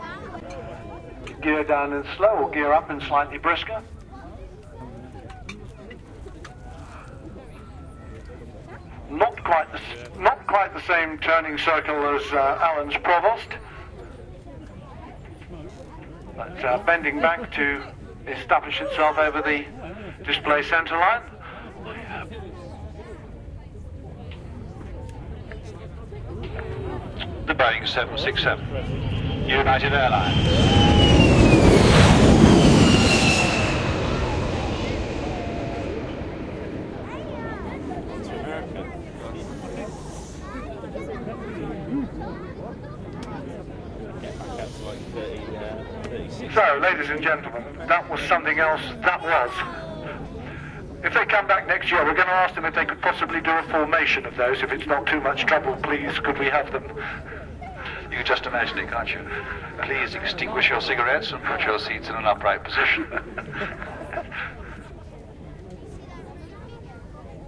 Airshow Snippet 2
Recorded with Sony MZ-R37 minidisc recorder and Sony ECM-MS907 about 12 years ago at Middle Wallop Airshow. The airshow is quite a small event, but the organisers managed to persuade a passenger jet to do a couple of low passes. The two old duffers doing the commentary are pretty funny...
plane, british, wallop, english, funny, talking, middle, jet, airshow